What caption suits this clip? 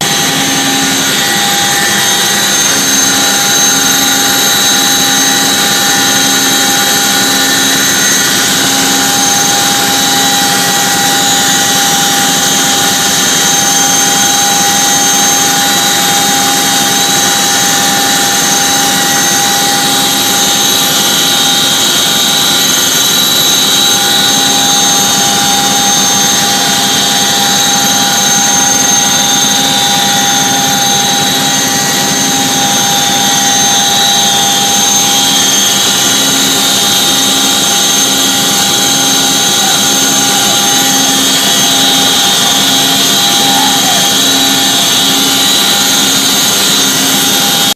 Military Aircraft Ambient Noise
Before jumping off a CH-47 Chinook helicopter in Morocco.
airplane, transporter, Chinook, parachute, helicopter, Aircraft, loud, jumping, Ambience, Noise, ambient, paratrooper, plane, heli, flight, CH-47, Military, Morocco